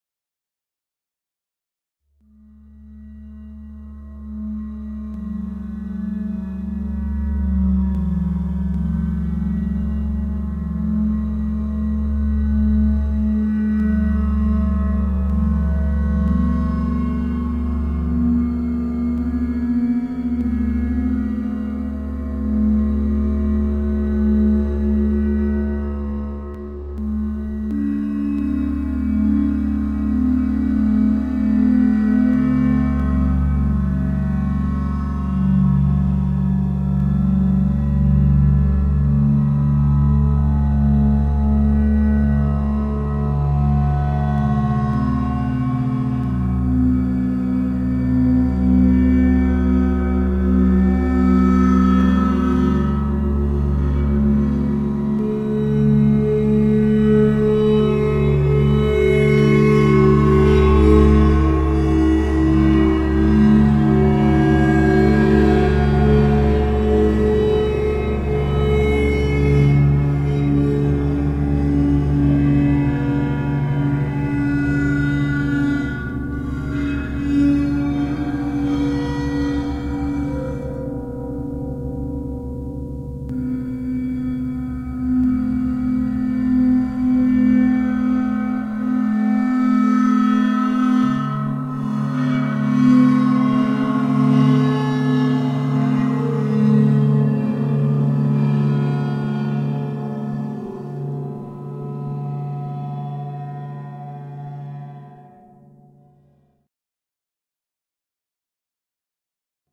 Bowed Aquaphone
A slowly evolving, background drone using samples of a bowed water phone, recorded live to disk in Logic and edited in BIAS Peak.
ambient, aquaphone, atmosphere, drone, soundscape, waterphone